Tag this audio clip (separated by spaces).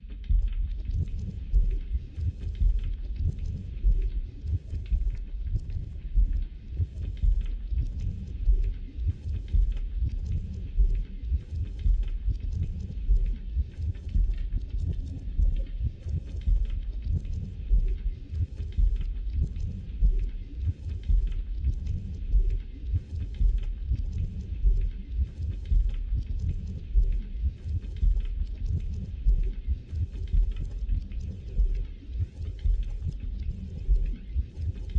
blowing wind tornado